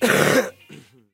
A small but rough cough captured during the recording of an acoustic guitar track.